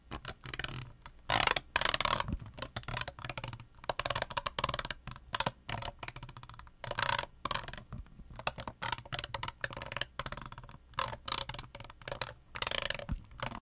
peineta y botella de plastico, cepillando botella.